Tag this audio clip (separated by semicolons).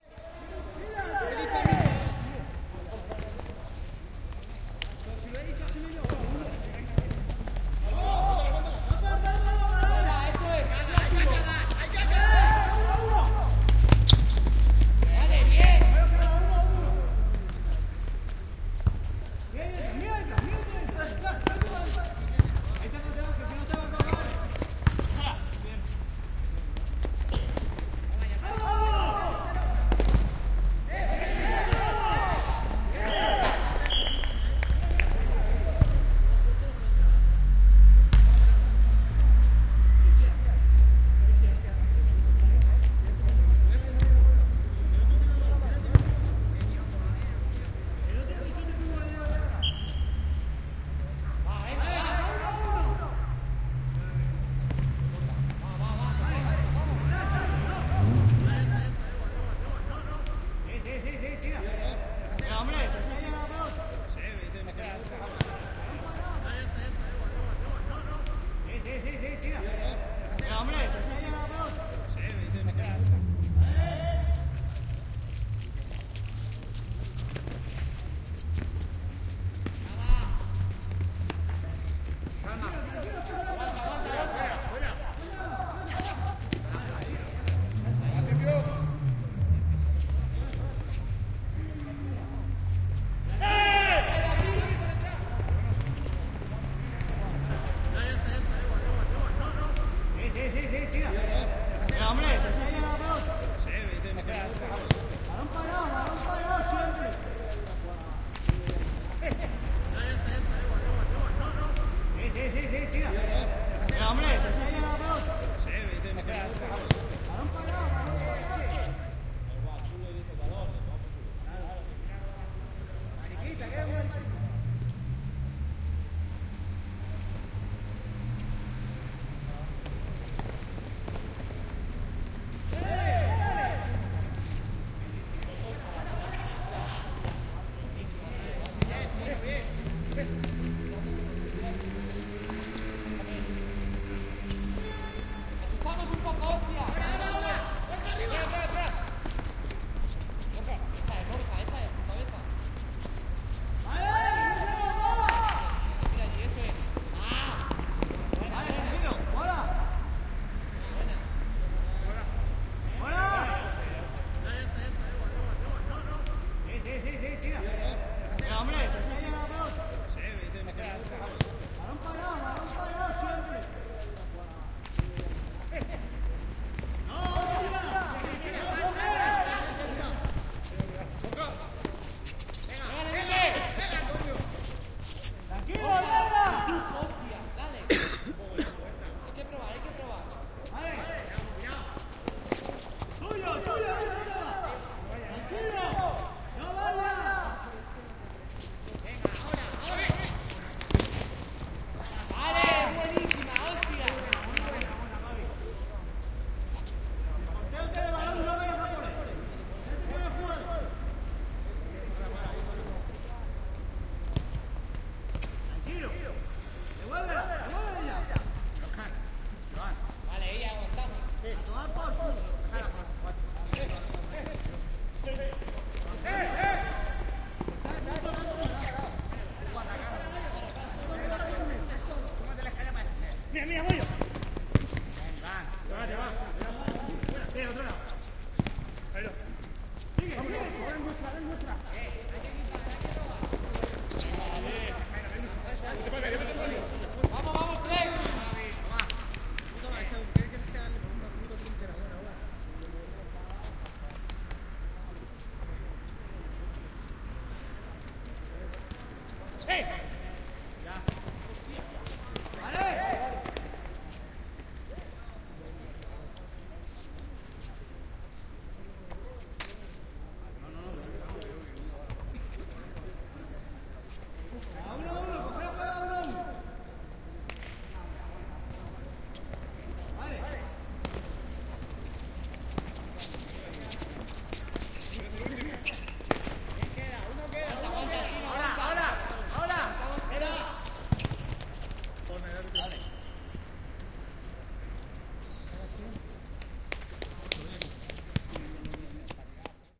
game; goal; competition; football; exterior; shouting; match; sport; soccer; ball; binaural; foot; spanish